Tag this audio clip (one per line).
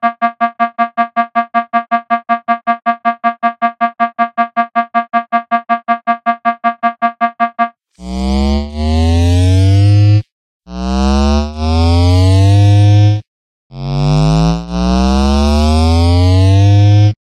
recharge
videogame
Halo
energy-shield
recarga
escudo
alarma
alarm
ciencia-ficcion
videojuego
escudo-energia
sci-fi
damage
shield